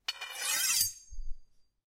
metal-blade-friction-7
metal metallic blade friction slide
blade, friction, metal, metallic, slide